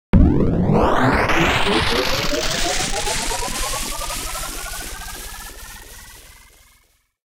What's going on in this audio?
A synthesized high tech warp drive sound to be used in sci-fi games. Useful for when a spaceship is initiating faster than light travel.
ftl, futuristic, game, gamedev, gamedeveloping, games, gaming, high-tech, hyperdrive, indiedev, indiegamedev, science-fiction, sci-fi, sfx, spaceship, video-game, videogames, warp, warp-drive, warpdrive